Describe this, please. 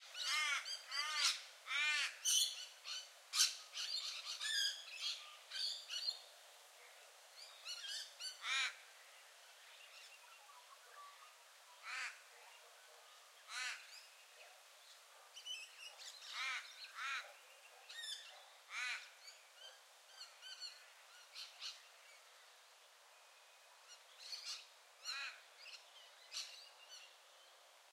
Ravens and Parrots
Binaural recording. I am standing in my front garden, there a lots of parrots and Ravens in the trees chatting.
parrot, bird, raven, field-recording